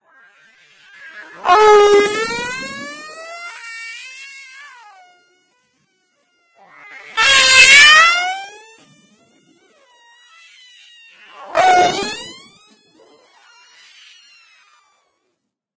electronic meows
aliens, art, beat, car, computer, explosion, flatulation, flatulence, frog, frogs, gas, laser, nascar, noise, poot, race, ship, snore, space, weird